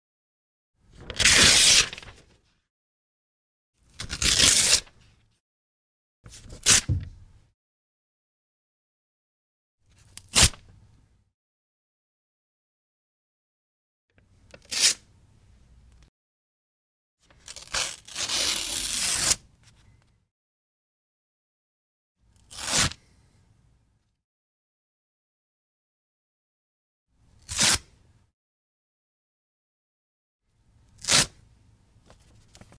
Several variations of paper ripping.